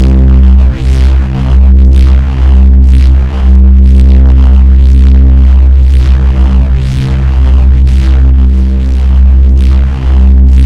Driven reece bass, recorded in C, cycled (with loop points)